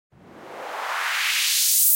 Lunar Short Uplifter FX 3
For house, electro, trance and many many more!